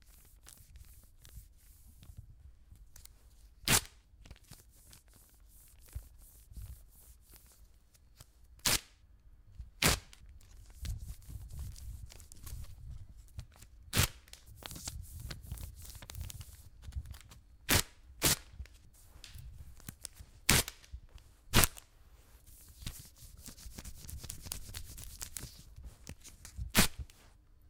wax 1 mono
Variation 1. Recording of a woman waxing her legs. Multiple actions were recorded. RODE NTG-2 microphone
wax, hair, legs, dry, waxed